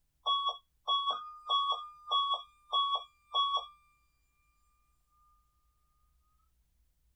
bus warning for driver 2
When button for warning is pushed